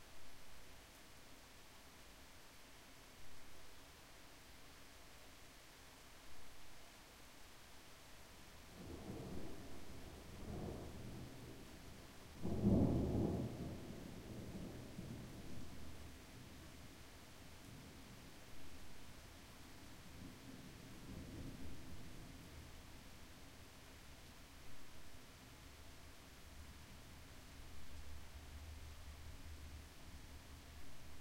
thunder noise 004
single thunder burst with light rain
weather, outdoor, nature